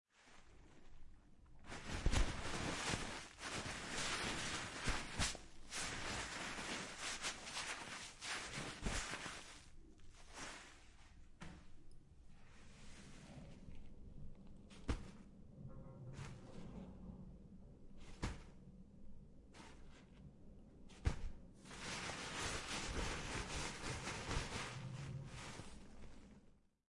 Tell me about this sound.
20190102 Touching the Toilet Paper
Touching the Toilet Paper
touching, paper, crumple, reading, fold, page